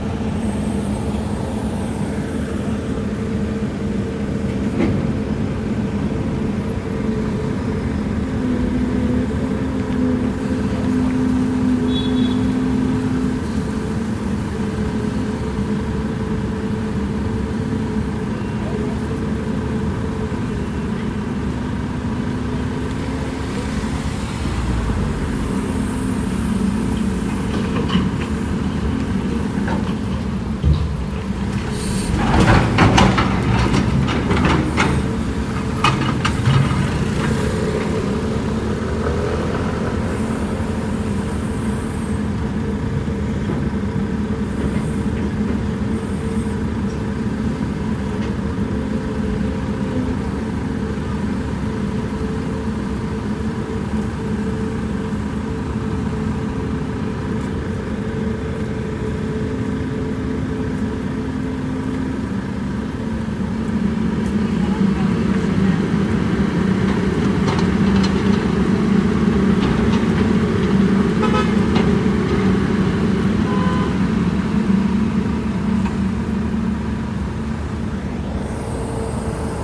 Night street construction 2
Another clip from different angle of construction work outside my house at night. Some drilling and hammering and different construction tools as well as some people talking and cars passing by. Recorded with my iPhone 11 mic.
ambience, banging, building, cars, city, construction, drilling, exterior, field-recording, hammer, hammering, heavy, israel, machine, machinery, mechanical, men, night, noise, noisy, outdoor, outside, power-tools, site, street, tractor, truck, work